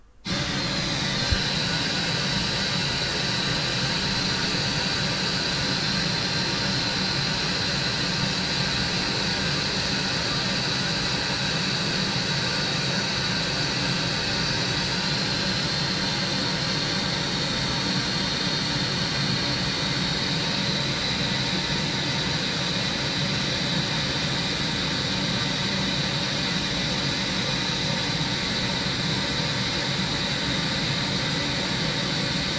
Recording of FM noise from living room stereo.